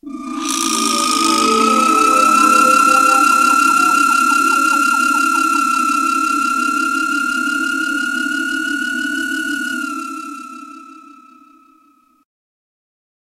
Alien Probe 11 sec
Aliens probe the earth.
Outer, Travel